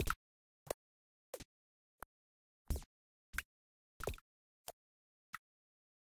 Good day. Short sounds 4 - for game, electronic music: clicks, blips, beeps